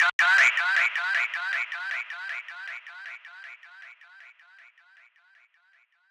the light
chopped up vocal and processed with delay and a touch of distortion
delay, processed, vocal